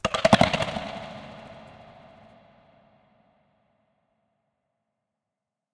microphone + VST plugins
effect, fx, sfx, sound